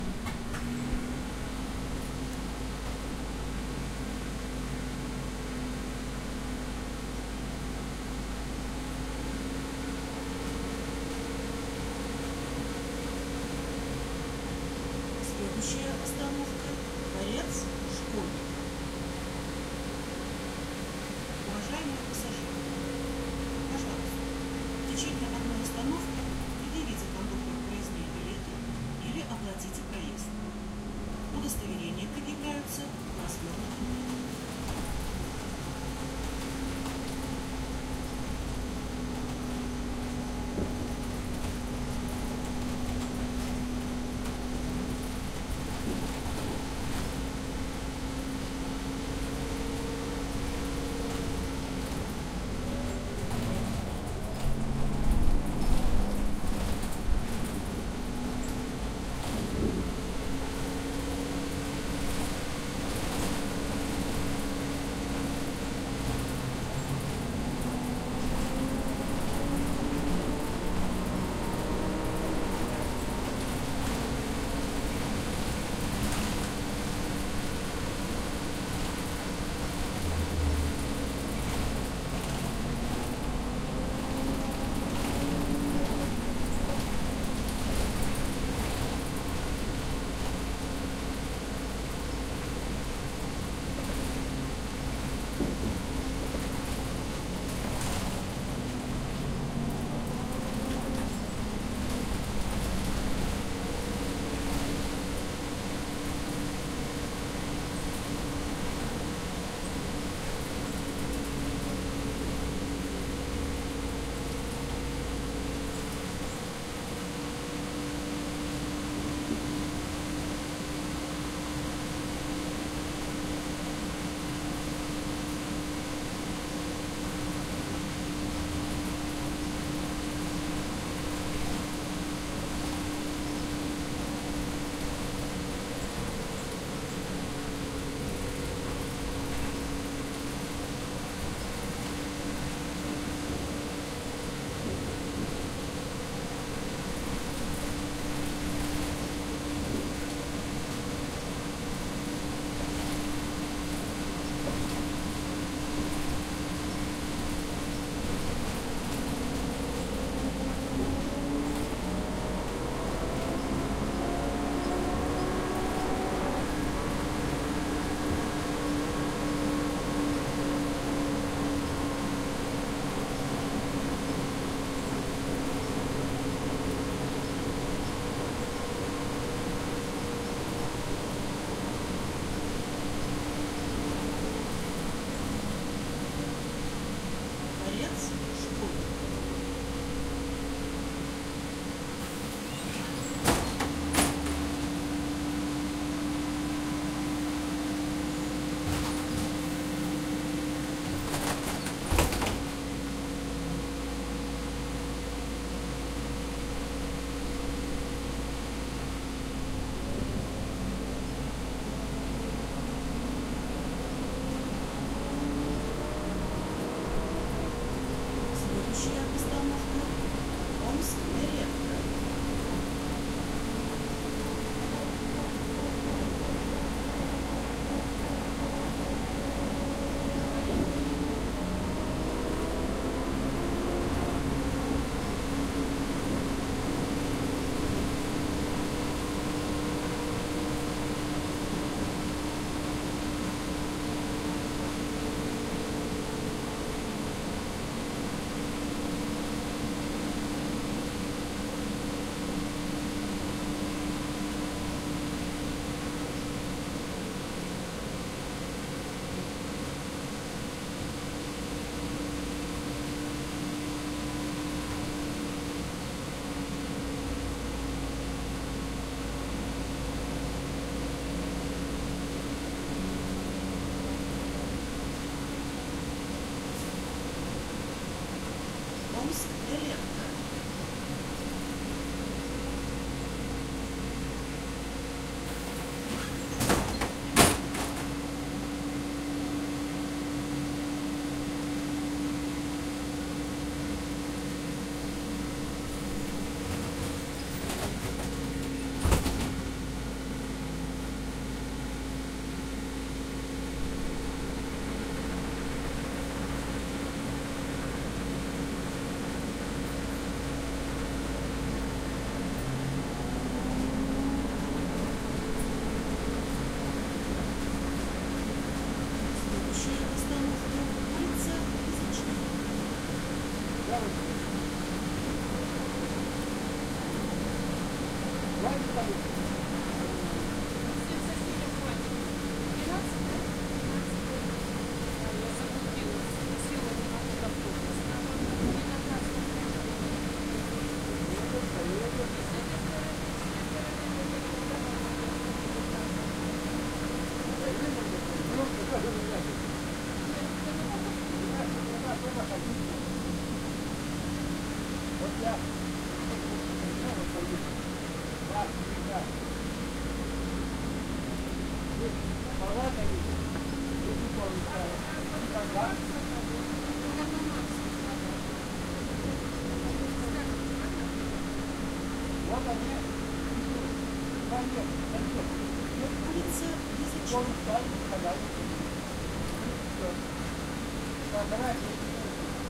Ride in the trolleybus